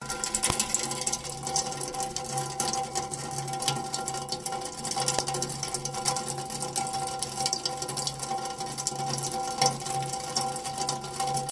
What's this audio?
Rain in Drain Pipe Gutter 4
drops, water, rain, weather, drainpipe, drip, dripping, gutter, raindrops, drips, raining, wet, drain